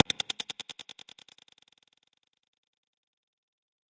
microphone + VST plugins